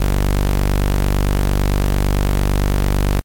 Multisamples from Subsynth software.
bass, noise, synth